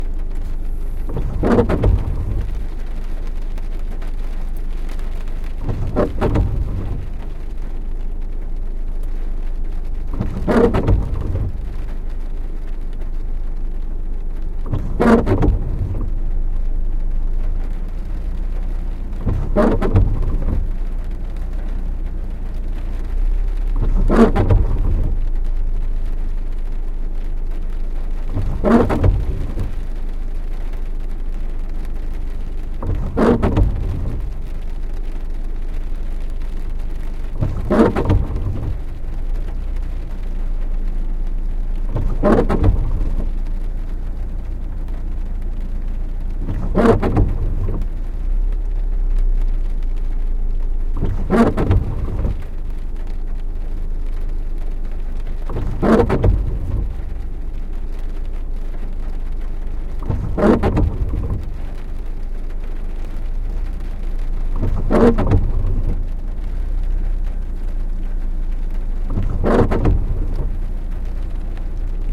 Field recording-windshield wipers on delay with rain from inside my car.
field-recording, rain, weather, windshield-wipers